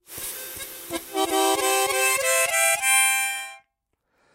Chromatic Harmonica 29
A chromatic harmonica recorded in mono with my AKG C214 on my stairs.
chromatic, harmonica